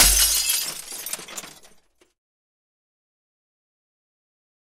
Glass Smash 5
A edited Glass Smash with equalization to give it a "sweetened" sound of the higher end of the acoustic spectrum and low-frequency limited bandpass to give it a "ear-hitting" effect (not clipping)
breakage, broken, edited, glass, glass-shattering, processed, shatter, smash, solid, splinters